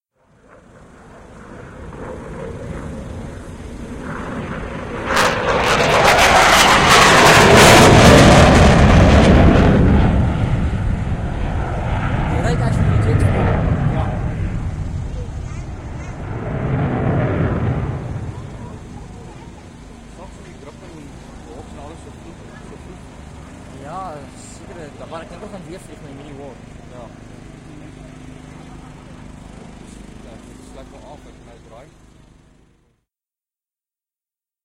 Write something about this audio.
Gripen flypast4
fast, Fly, past